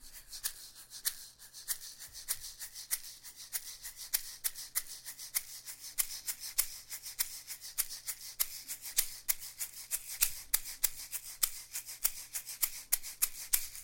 Egg Shaker - Closed Groove 1
A groove done on an egg shaker with a closed hand.